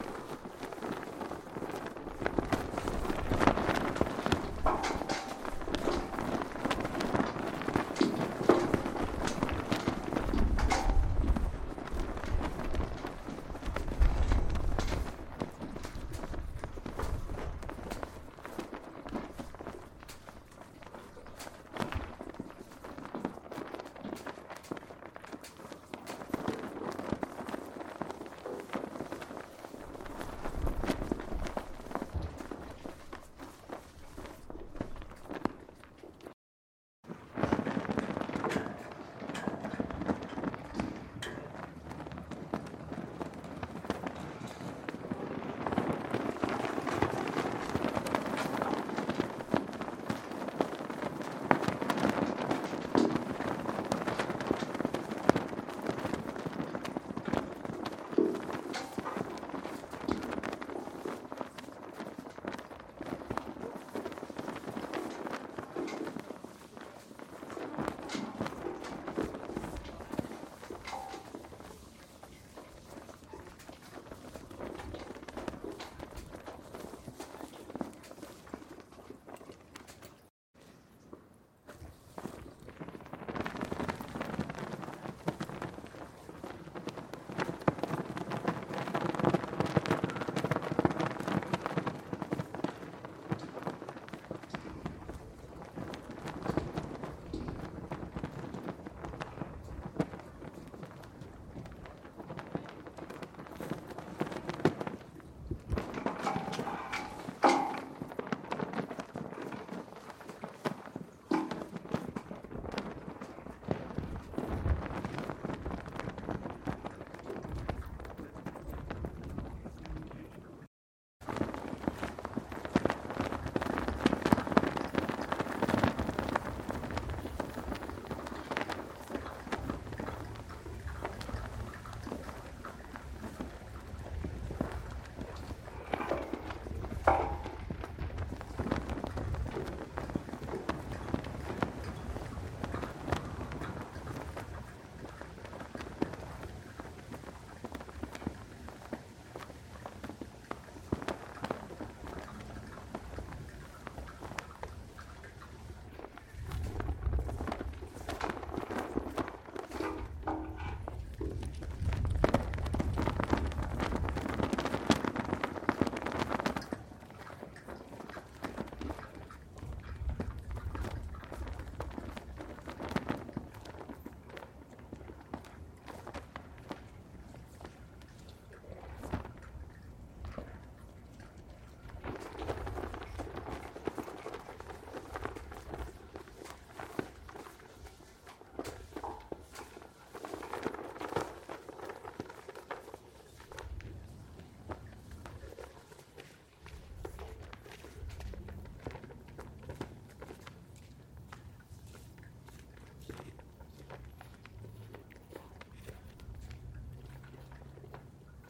flag flaps back and forth between 2 flags in high wind on mountain good crisp fabric detail
2, back, between, crisp, fabric, flag, flags, flaps, forth, high, mountain, wind